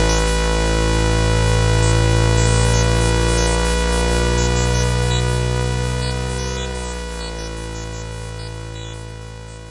recorded from a Moog voyager.